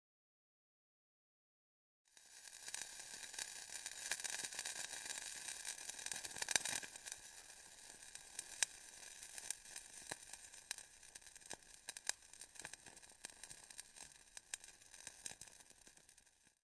The sound of the hissing burning lighter in the water.